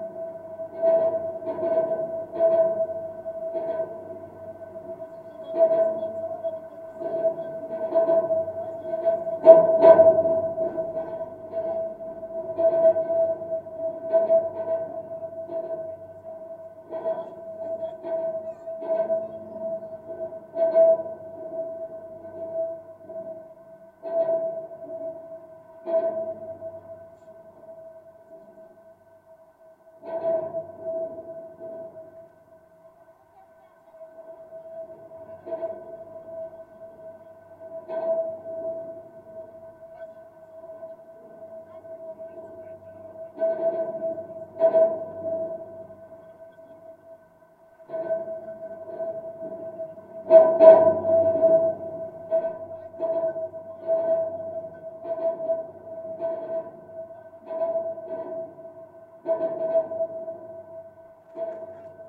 GGB A0227 tower NEE door
Contact mic recording of the Golden Gate Bridge in San Francisco, CA, USA from the center of maintenance door, east side of the east leg of the north tower. Recorded October 18, 2009 using a Sony PCM-D50 recorder with Schertler DYN-E-SET wired mic.
contact-mic, Schertler, Golden-Gate-Bridge, contact-microphone, steel-plate, microphone, wikiGong, contact, metal, bridge, field-recording, Sony-PCM-D50, DYN-E-SET, cable, steel